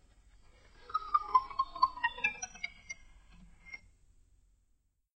ghostly tickles
Guitar strings plucked, edited and effected with Garageband.
haunted, tickles, creepy, ghost, weird, wind, horror, paranormal, surreal, airy, ASMR, air, wet, guitar, tickle, goosebumps, atmosphere, ambient, ethereal, phantom